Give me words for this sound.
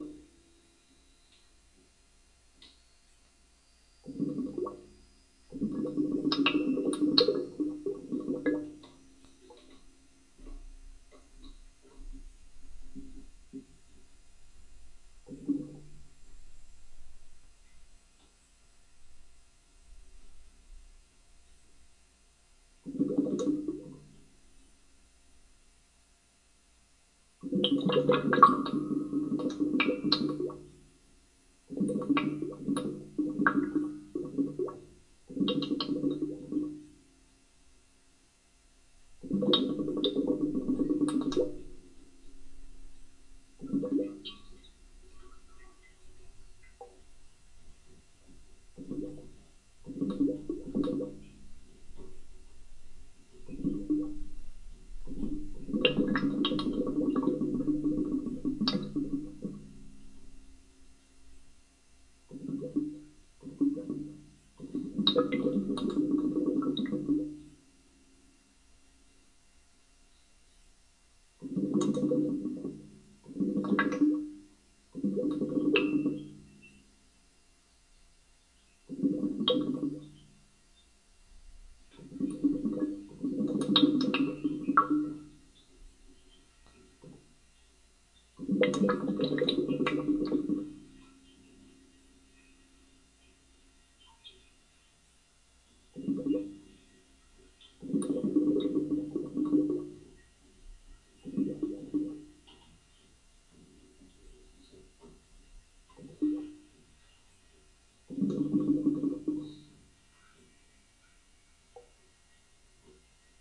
more heater gurgles
Recording my gurgling radiator after it turns off. The contact mic make it seem very loud and strange and alien. Recorded with a Cold Gold contact mic into a Zoom H4.
contact, gurgle, metal, radiator, reverberation, steam, water